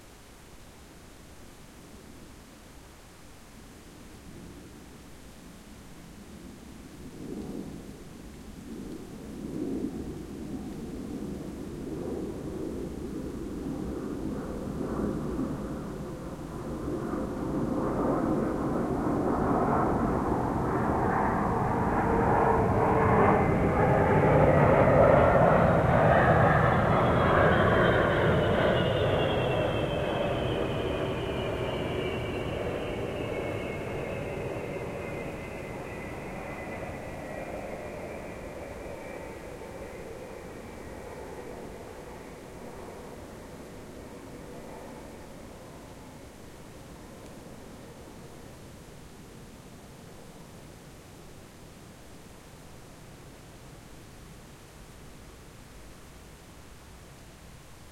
Low Approach F
Airliner flying low over a rural area at night.
These are the FRONT channels of a 4ch surround recording.
Recorded with a Zoom H2, mic's set to 90° dispersion.
4ch, aeroplane, aircraft, airliner, airplane, aviation, field-recording, flight, fly, flying, jet, loud, night, over, pass, plane, surround